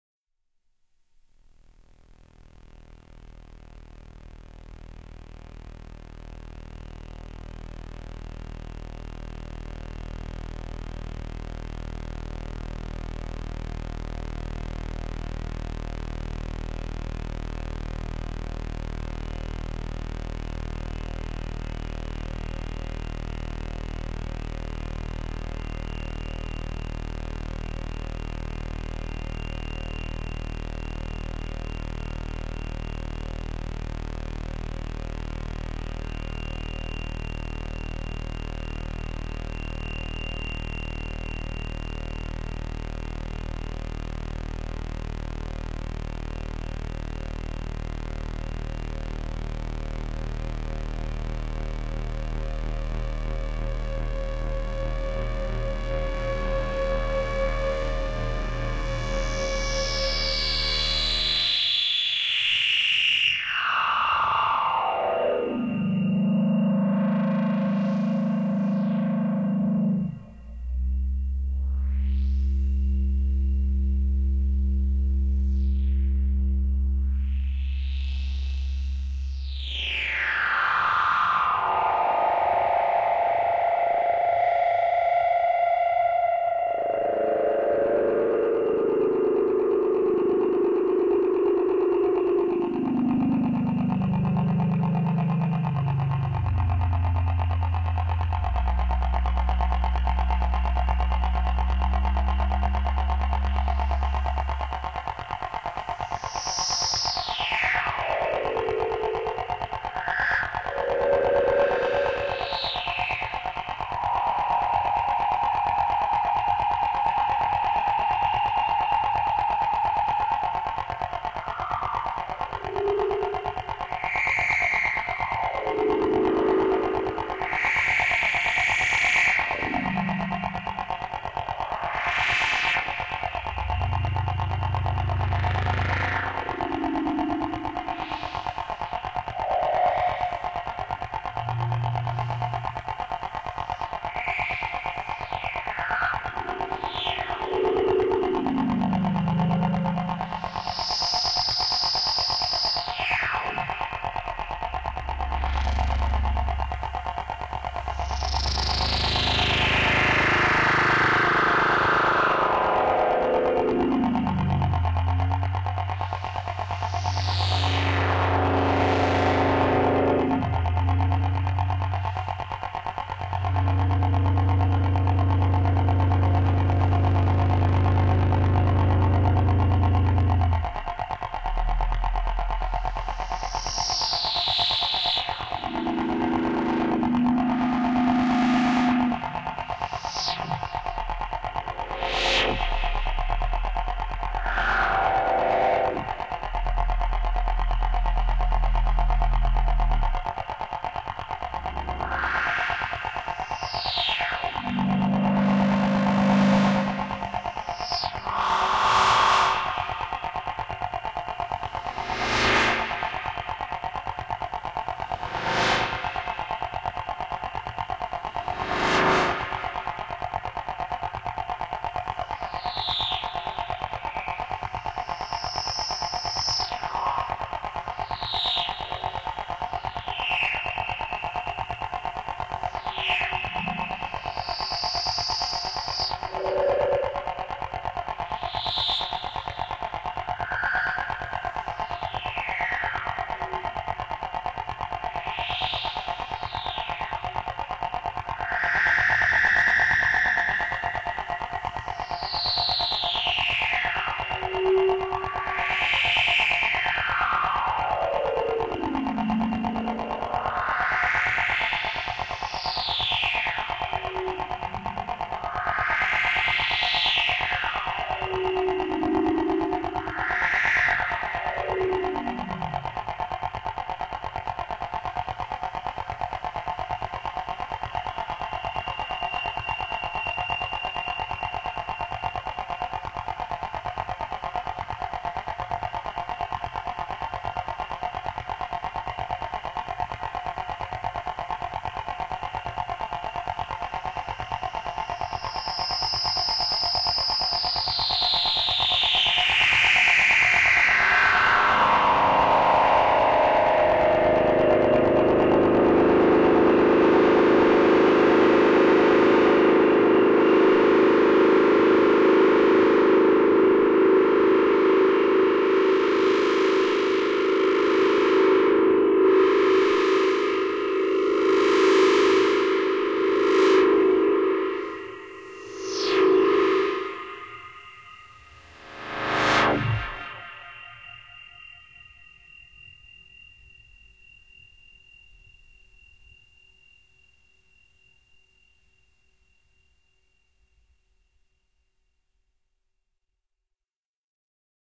This sound was created using three Korg Monotrons (Original, Duo and Delay) with the help of a Behringer V-amp2 for FX and feedbacks. All the sounds were manipulated in real-time, no post-processing was done to the track. Ideal for sampling and create new SFX or for ambiances. The title correspond to the date when the experiment was done.